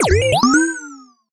Game Bleeps 1
Something synthesised in NI Massive which could be used as an effect in an old-school game or something similar.
arpeggio, massive, game, synthesis, arps, bleep, oldschool